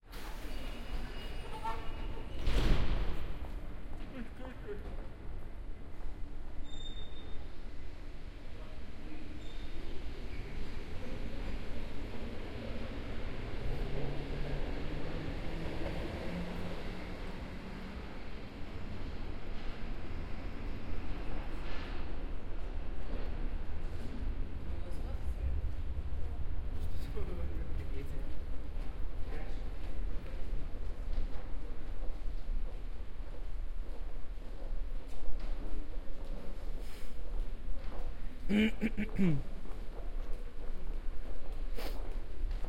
München U-Bahn 01
Munich Subway Recorded 2006